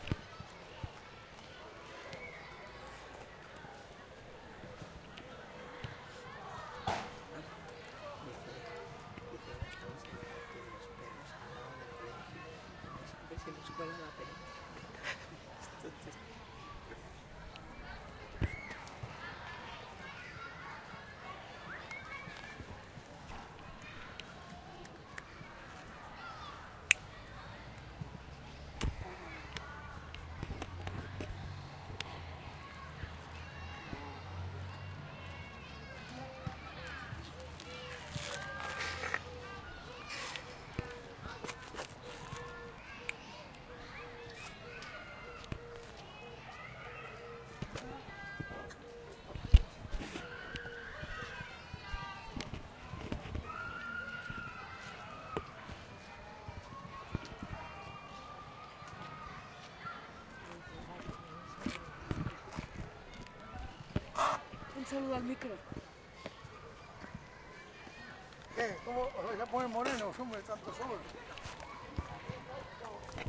collab-20220510 ParcGuineueta Humans Nice
Urban Ambience Recording in collab with La Guineueta High School, Barcelona, April-May 2022. Using a Zoom H-1 Recorder.